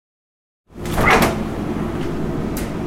Fridge door open
door, open